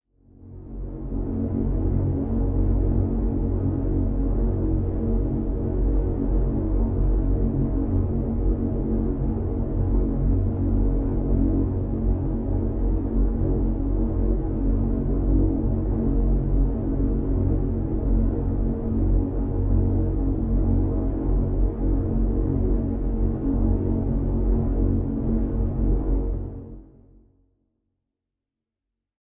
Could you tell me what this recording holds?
EngineRoomPipeNoise1 FixFilt Env
This one fades in and out, has a fixed tonal and filter structure. It's low-pass filtered noise with multiple complex delays with feedback that have a harsh but stereo-correlated effect forming a soundscape with the impression of metal, pipes (large tubes), and perhaps the engines of some fictional vessel. Created with an AnalogBox circuit (AnalogBox 2.41alpha) that I put together.